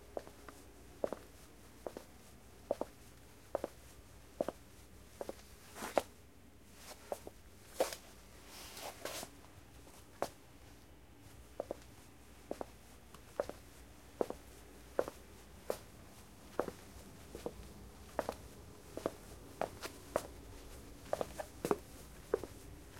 ARiggs FootstepsWoodFloor 4.2.14
Footsteps on a laminate wood floor.
-Recorded on Tascam Dr2d
-Stereo
Shoes, Field, Walk, Feet